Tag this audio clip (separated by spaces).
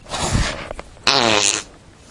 flatulence laser noise snore weird